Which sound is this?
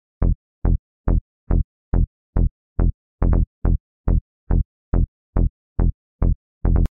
my bass audiosample, 120 to 140 bmp
electronica, dance, acid, trance, bass